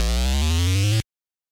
Arcade Boost
Arcade Sound FX.
8-Bit, 8bit, Lofi, old